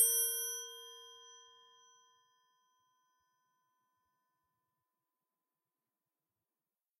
Soft wrench hit A#3
Recorded with DPA 4021.
A chrome wrench/spanner tuned to a A#3.
ring, percussive, Wrench, spanner, tonal, harmonics, hit, dissonant, high, metal, chrome